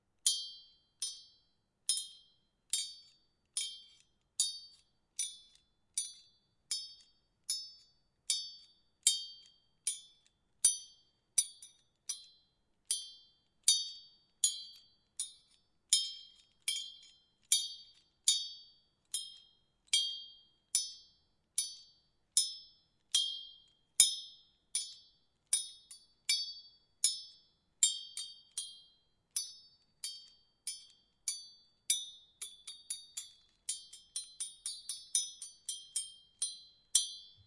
Hitting Copper Pipe (High Pitched)
High Pitched sound of metal hitting a copper pipe. Recording on a Yeti microphone.